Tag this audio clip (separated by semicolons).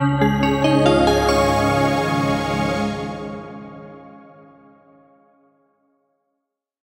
boot; jingle; ReactOS; start; tune